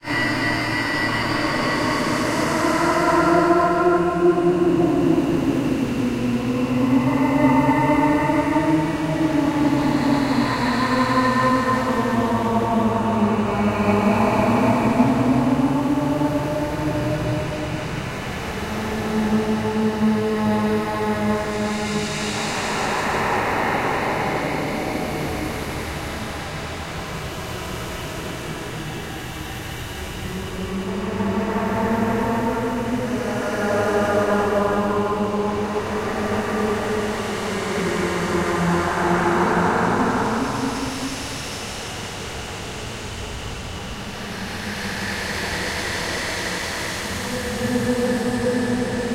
Almost illegal, horrifying and purely evil noises created by paulstretch extreme stretching software to create spooky noises for haunted houses, alien encounters, weird fantasies, etc.
alien, evil, ghost, halloween, haunting, horror, paranormal, scary, stretch